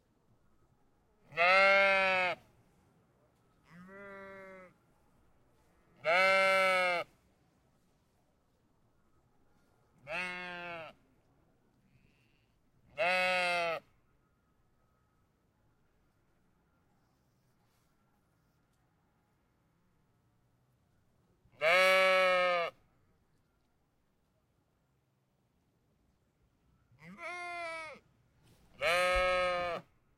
sheep bleat outdoors
bleating sheep in a field, recorded from a close distance.
Schoeps CMIT 5u/MK8 ->Sound Devices 702t